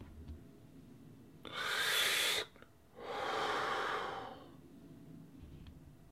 I'm mimicking the inhalation of a man inhales a cigar and then release the smoke.